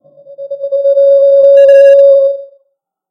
A Blue Yeti microphone fed back through a laptop speaker. Microphone held real close to invoke feedback. Sample 3 of 3, low pitch shifted down.